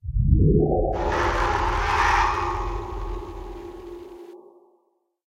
piano harp 2
piano harp sample band filtered remix
piano-harp, strum, transformation